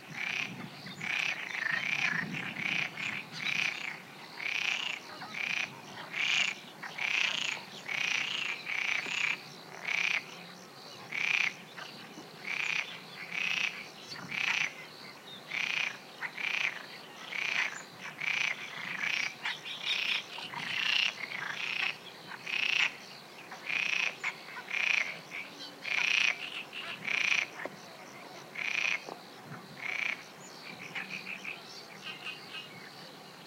20060426.lobo.dusk.frogs
frogs croaking in the marshes, at dusk. Sennheiser ME62 into iRiver H120 / ranas croando en las marismas, al anochecer
birds, field-recording, frogs, insects, marshes, nature, pond, spring